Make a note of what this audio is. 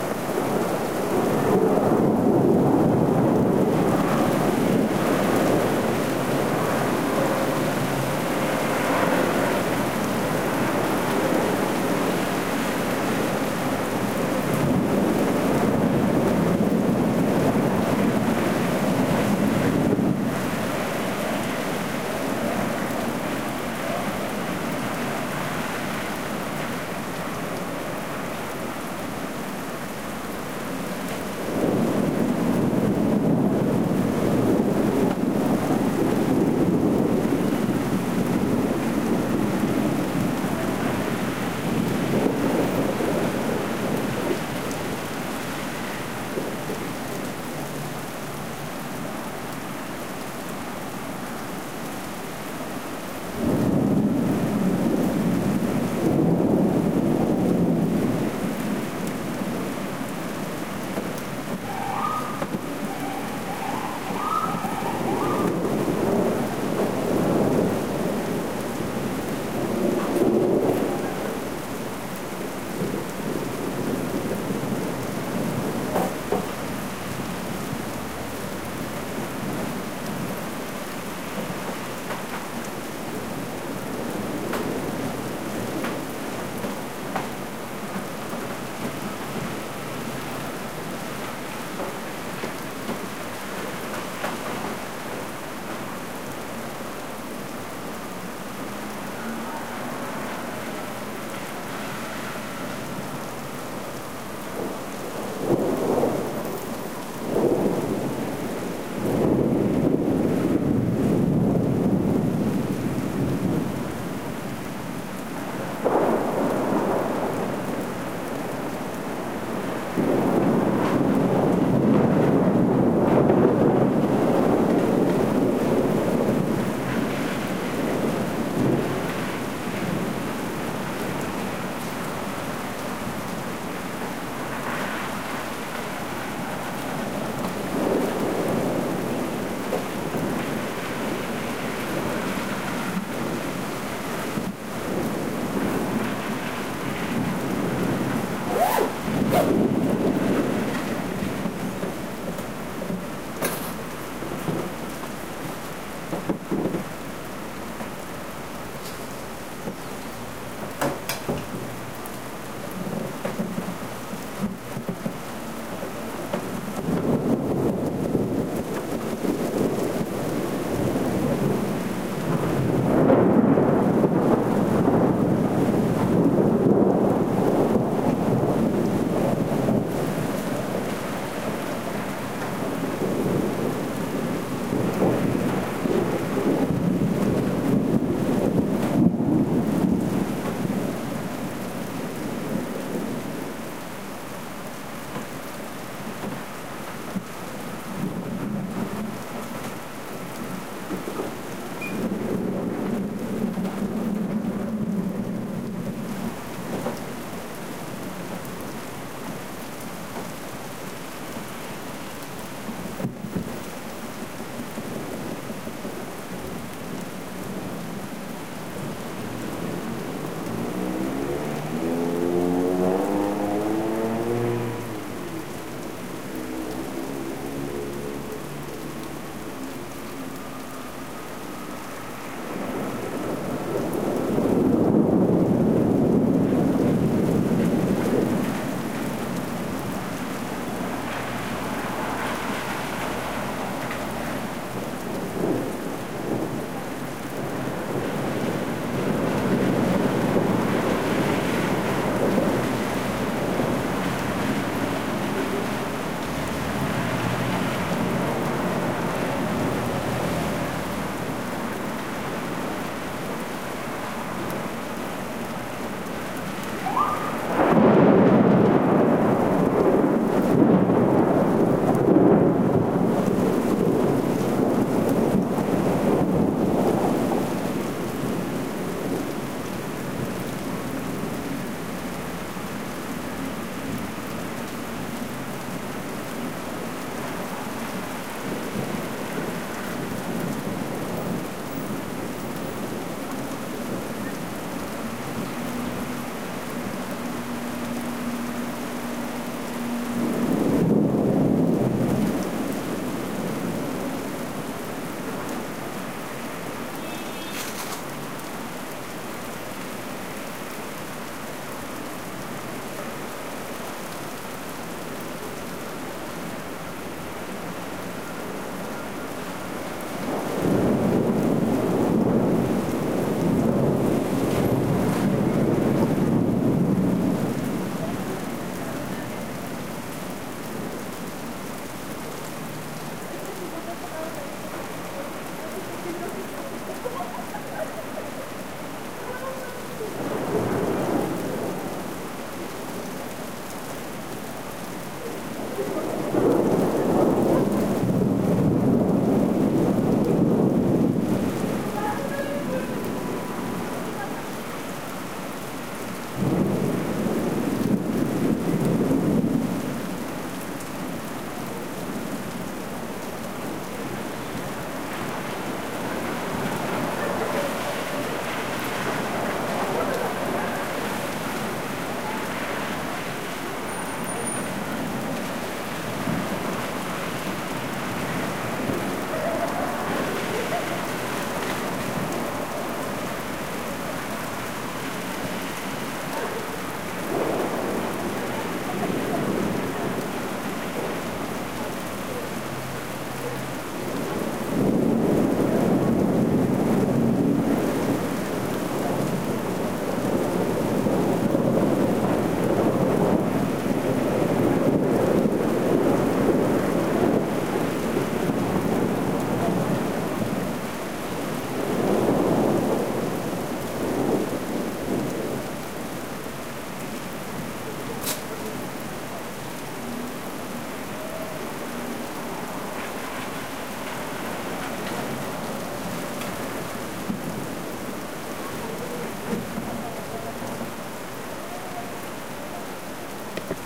A rainy afternoon in Mexico City